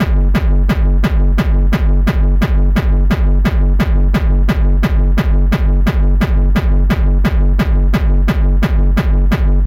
174-hardcore-techno-bassdrum-loop
1 distorted bassdrum loop, strange stereo?
bassdrum, distorted, hardcore-techno, gabber